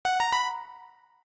Complete/obtained sound
gamesound, cute, videogame, complete, obtained, level, interface, positive, UI